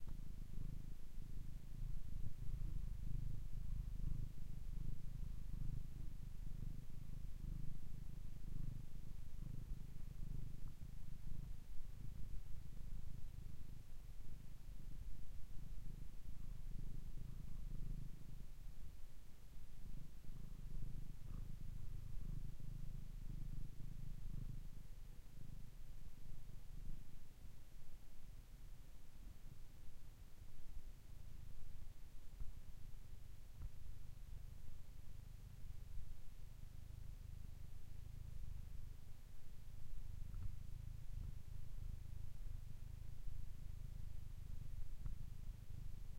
Cat purr 2
feline, animal, purring, cat, cat-sounds, purr